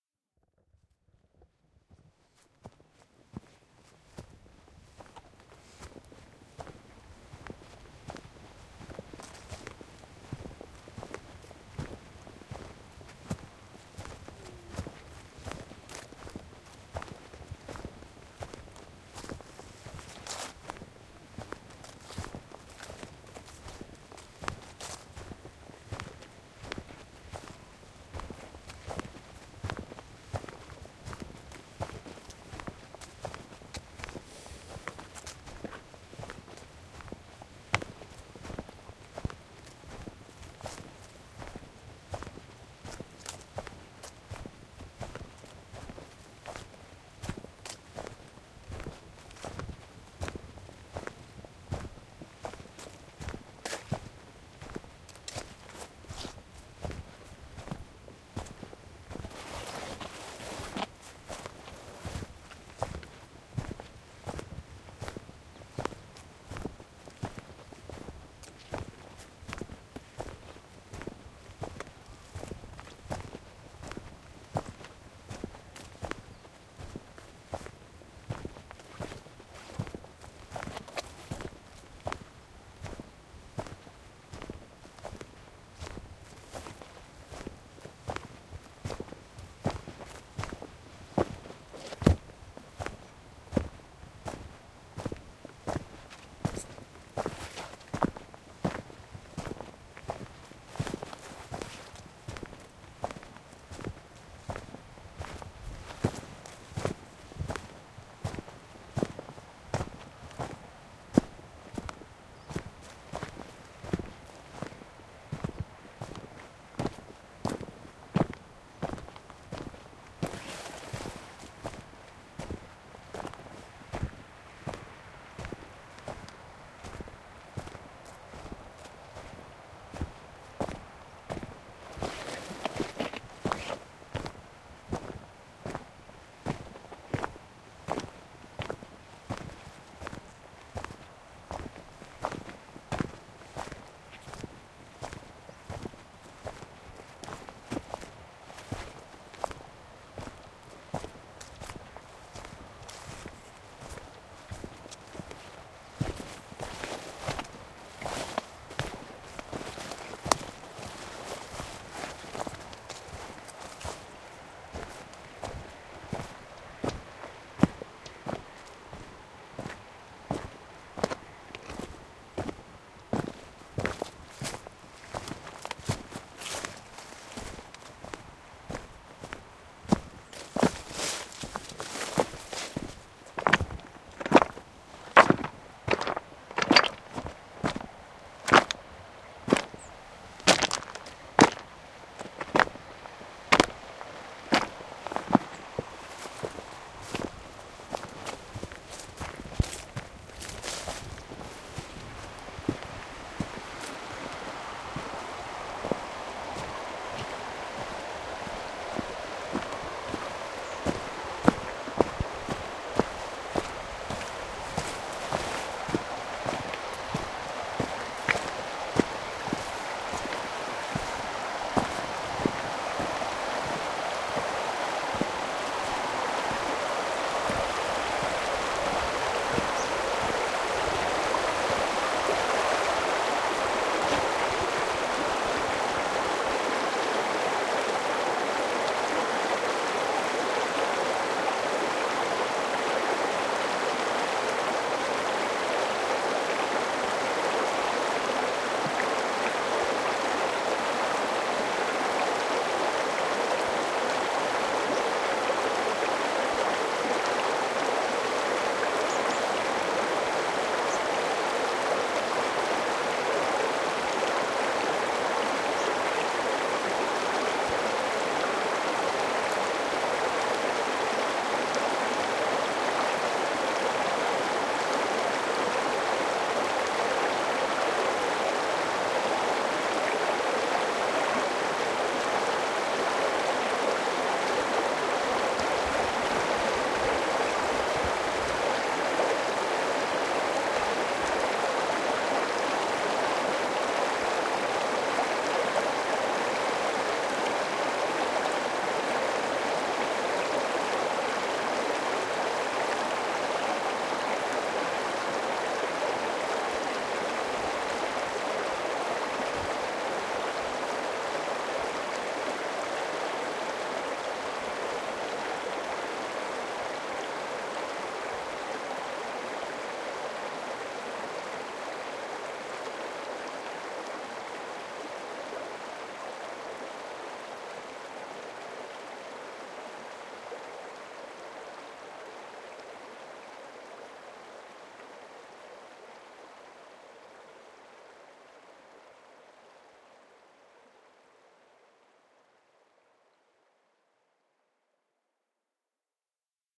I recorded the sound in the Altai mountains that are located in Russia. First you hear footsteps. Soon the sound of the river is presented.
Altay, mountains, river, Russia, steps, trip, water